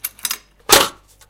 Some metallic/mechanical sounds